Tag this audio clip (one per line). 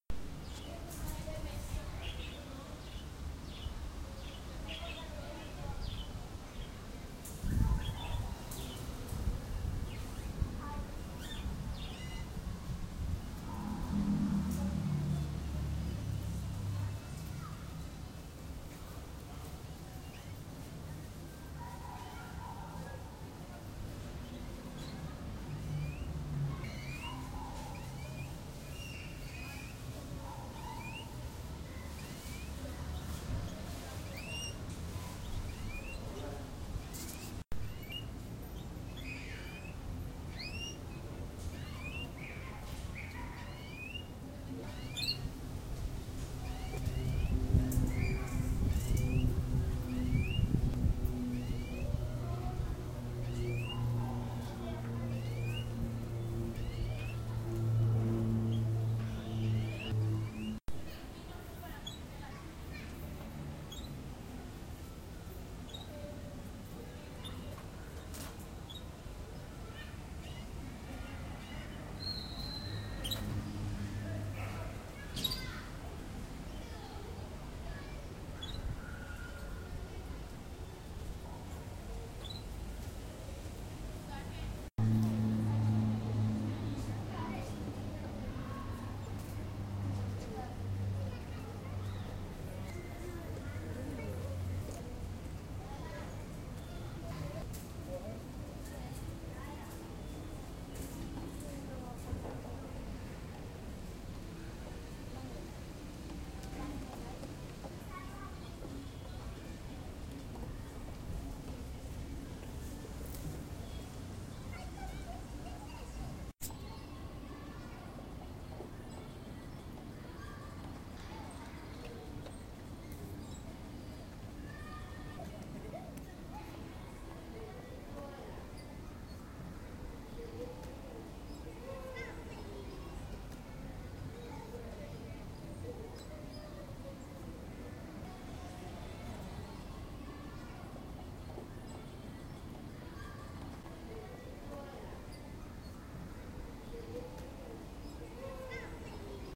tronco
madera
arbol